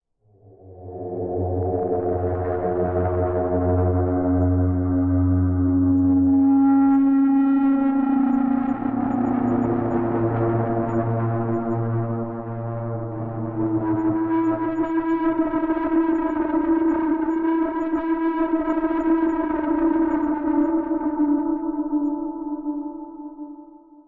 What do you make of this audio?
Fearsome Ambience
chant, creepy, ethereal, evil, ghost, ghostly, horror, monk, scary